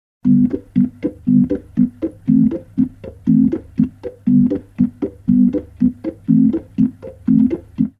Reggae rasta Roots